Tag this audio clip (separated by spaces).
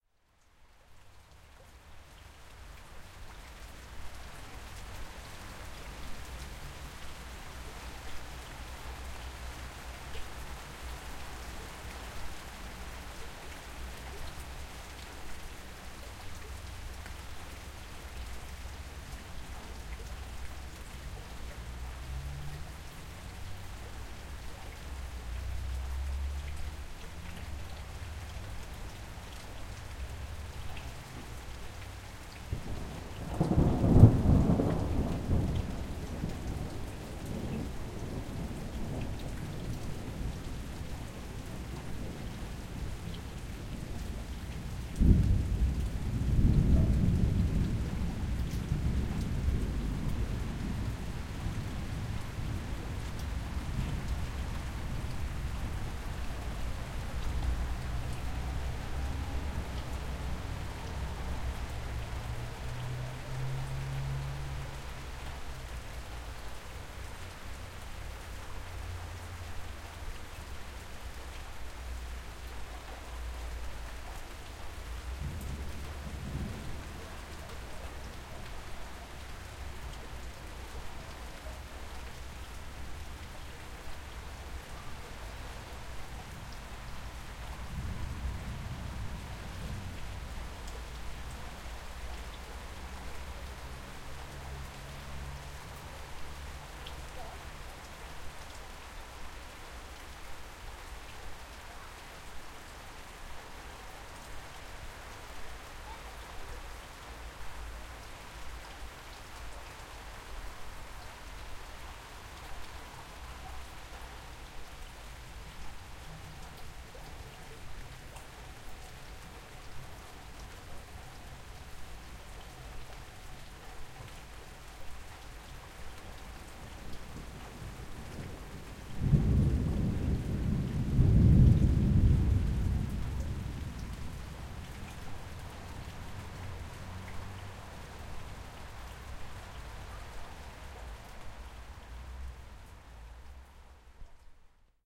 Ambience Germany Thunderstorm Bavaria Village